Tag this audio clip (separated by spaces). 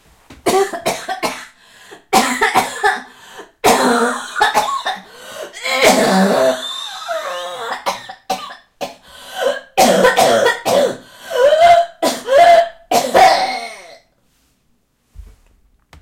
cough female-cough Horrible-cough strong-cough strong-female-cough